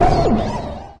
STAB 014 mastered 16 bit from pack 02
An electronic effect composed of different frequencies. Difficult to
describe, but perfectly suitable for a drum kit created on Mars, or
Pluto. Created with Metaphysical Function from Native
Instruments. Further edited using Cubase SX and mastered using Wavelab.